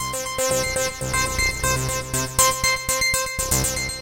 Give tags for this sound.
loop; synth

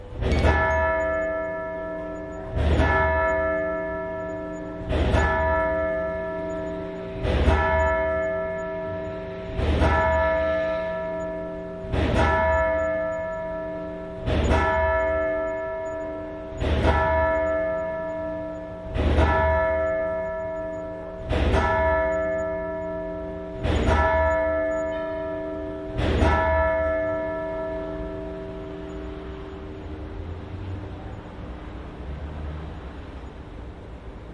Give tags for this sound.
12h-bell; alreves; Brasil; church-bell; field-recording; programa-escuta; santa-cecilia-church; Sao-Paulo

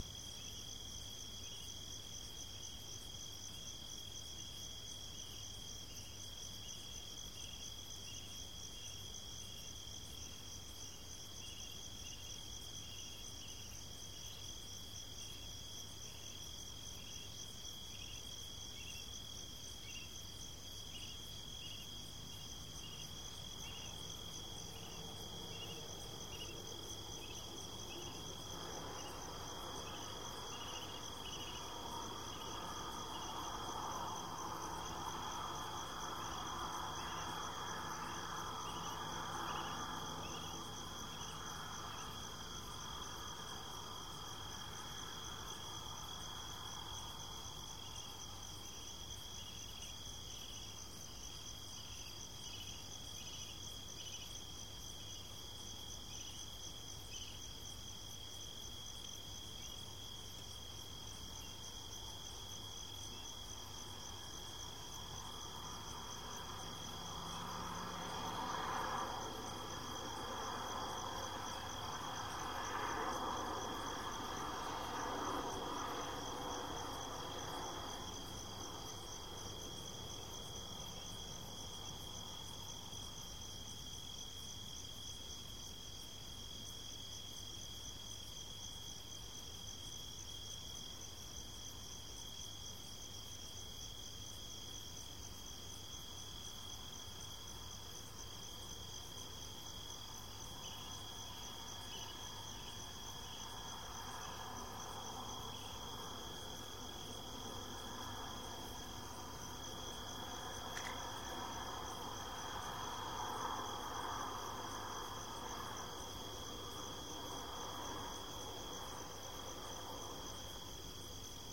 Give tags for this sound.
Izrael; Shlomi; cicadas; night; noise

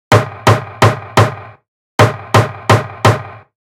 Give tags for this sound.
Hot; Distortion; Drums; Kick; Rim; Driven; treble; Drum; Raw; Overdriven; Overdrive; Snare; Single; Hard; Smack; Loop; Heavy; sound; Rim-Shot; Thick; Distorted; shot; Simple

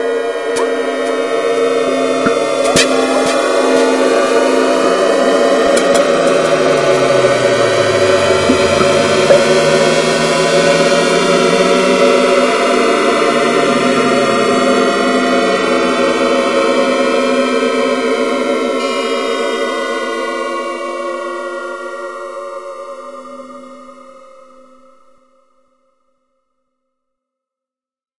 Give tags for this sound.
processed; remix

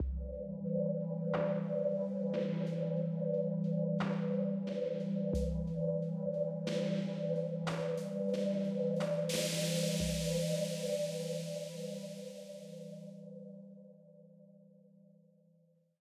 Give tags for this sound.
synthesized; fragment; music; static; drones; suspense; ambience; non-linear; non-linear-music; drone; water; game; chill; synthesizer; dark; underwater; game-music

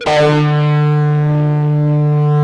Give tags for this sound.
bass; guitar; electric; multisample

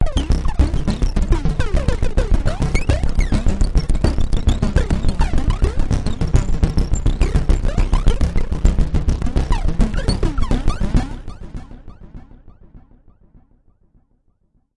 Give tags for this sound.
arpeggio; electronic; loop; multi-sample

